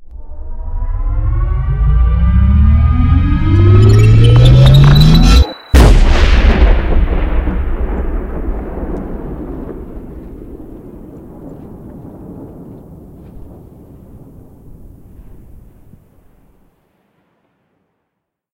Build up Detonation
The initial idea was to build an electrical malfunction explosion, but I ended up with this.
Credits: